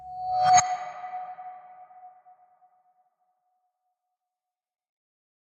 Sound Design - Doorbell
This is a reversed door bell sound, processed, and with added verb. Great for title treatments and other sound design work.
doorbell, chime, ring, bell, processed